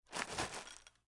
CEREAL SOUNDS - 62
clean audio recorded in room ambience